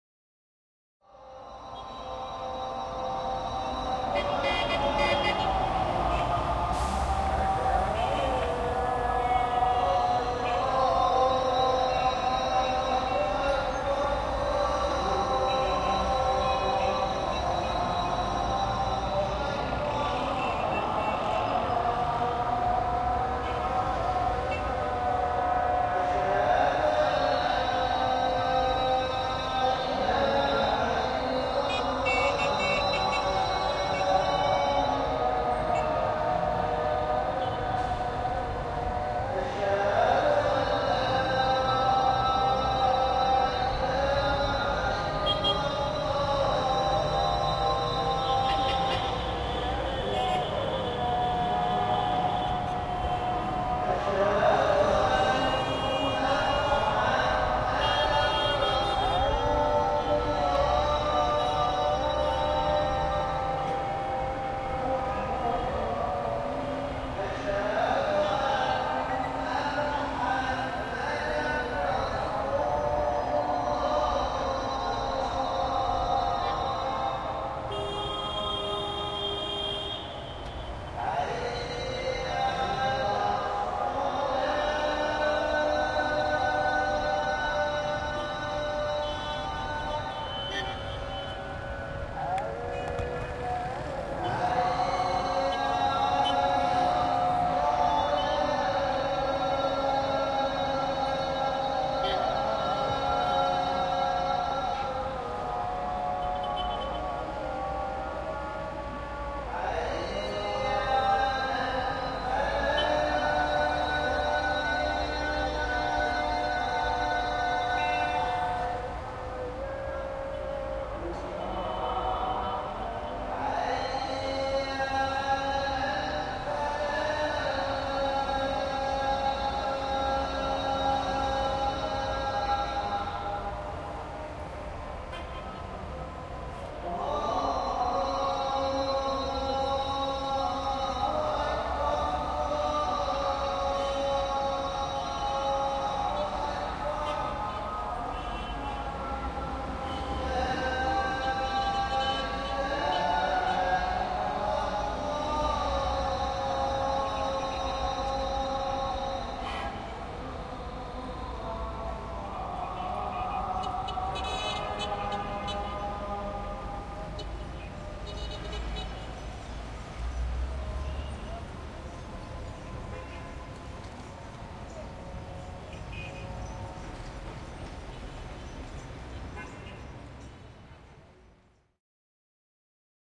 2014/11/24 - Cairo, Egypt
Ibn Tulun mosque. 5pm. Muezzin calls from mosques all over, in the Islamic Cairo.
Recorded from the minaret of Ibn Tulun mosque.
Beginning missing.
ORTF Couple with windscreen
Cairo, Call, Egypt, Islam, Islamic-Cairo, Mosque, Muezzin